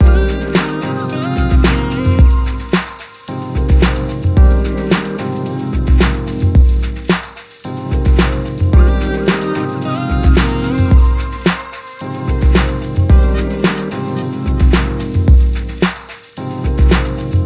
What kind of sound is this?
VHS Electric Smoke by DSQR 110 bpm
A music loop I just made while trying out a new virtual drum machine. It has something old school to it. I hope you'll find it useful.
loop, music, jazz, 80s, 110bpm, drums, chillout, background, 90s, breakbeat, smooth